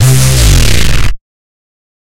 Reece Drop (Without Delay)
1, 4x4-Records, Analog, Bass, Beat, Closed, DRM, Drum, Drums, EDM, Electric-Dance-Music, Electronic, House, Kick, Loop, Off-Shot-Records, Open, Sample, Snare, Stab, Synth, Synthesizer, TR-606, Vermona